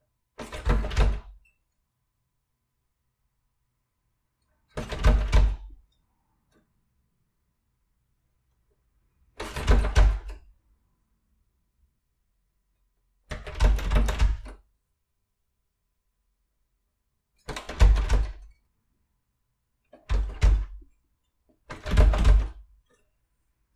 The sound of a locked door being interacted with.
Door, Game, Locked